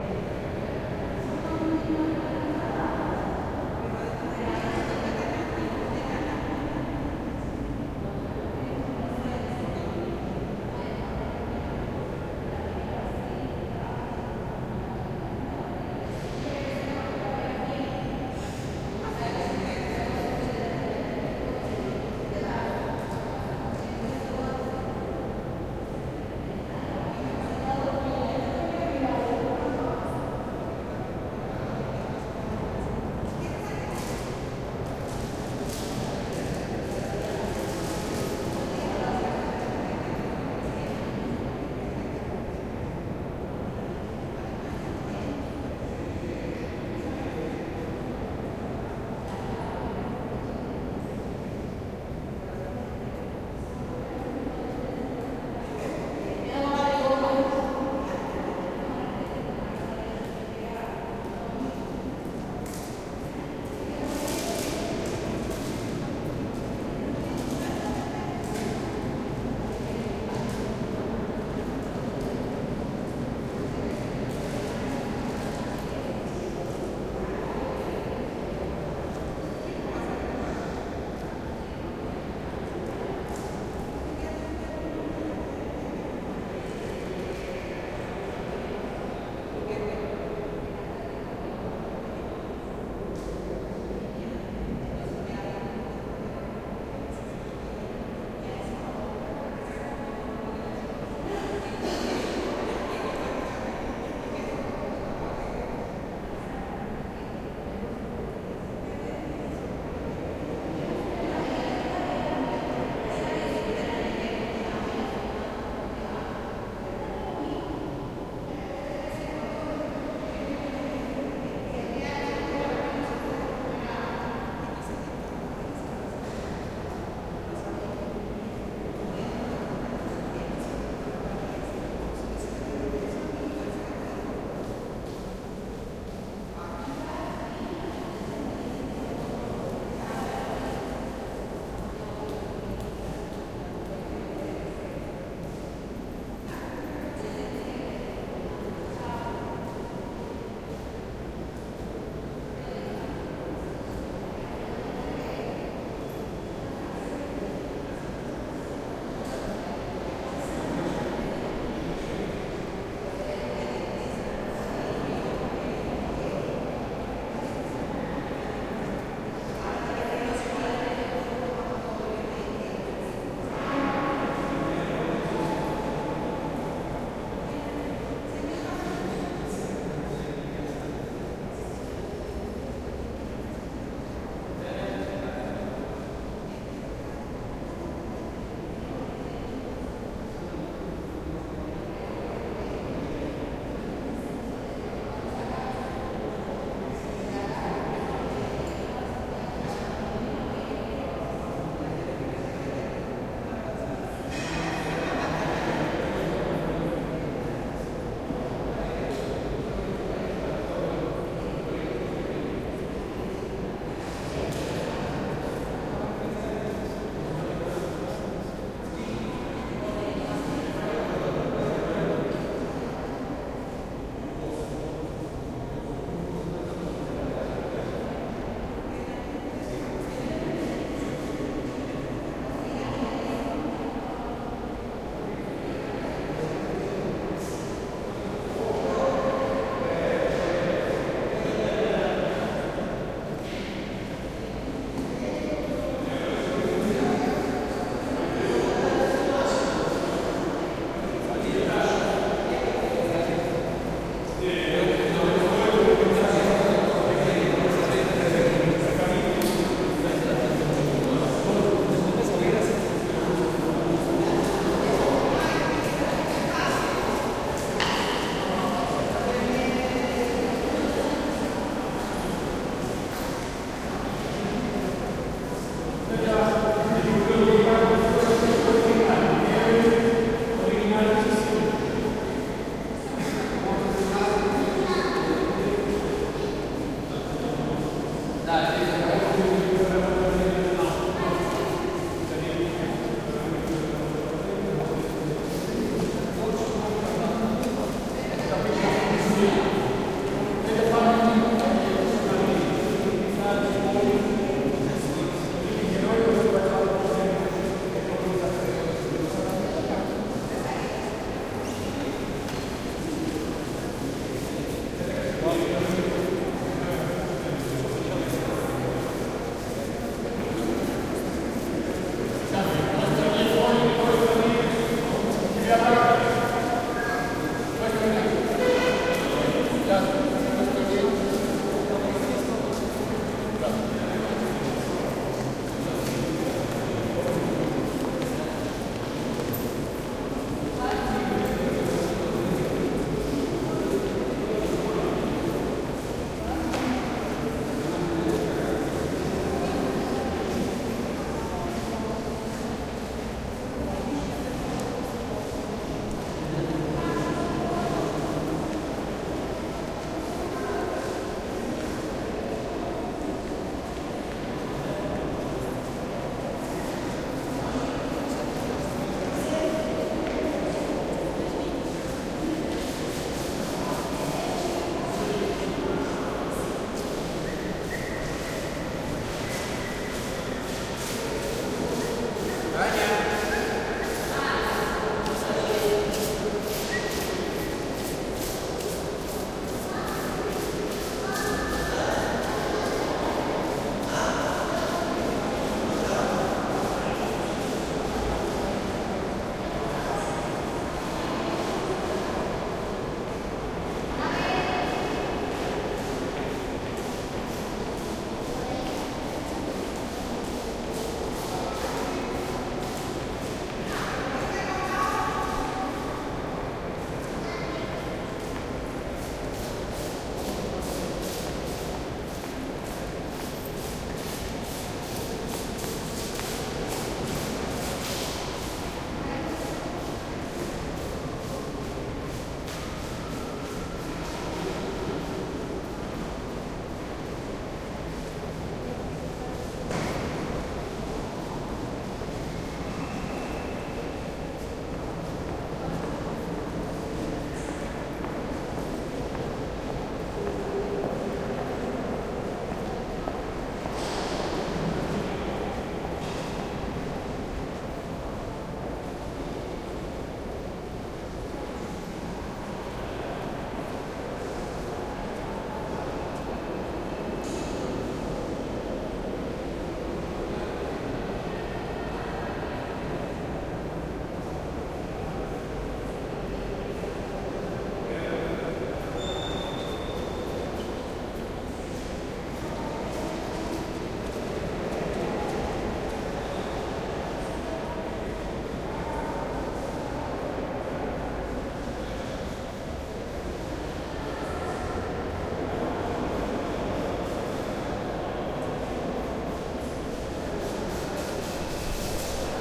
museum hall big echo group. group actually comes and goes (lucky me) so there's roomtone with distant crowd too.